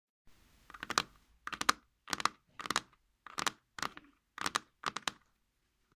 Tapping on wooden desk with fingernails
drumming, nails, scratching, fingernails, tapping, OWI, rhythm, wooden